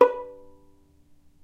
violin pizz non vib B3
violin pizzicato "non vibrato"
violin, pizzicato, non-vibrato